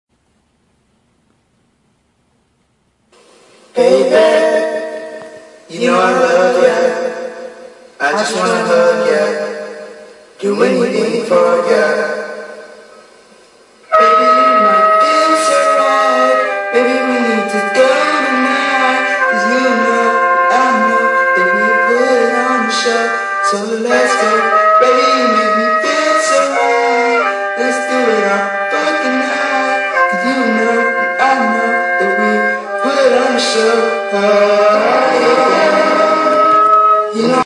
No beat melody